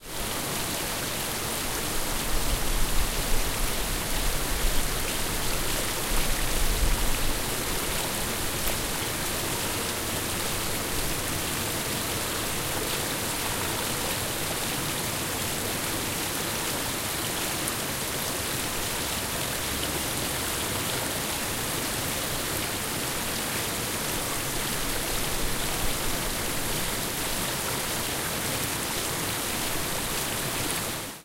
Rushing Stream Water
Elaine, Field-Recording, Koontz, Park, Point, University